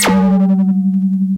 A Casio CZ-101, abused to produce interesting sounding sounds and noises
casio, cosmo, cz101, alias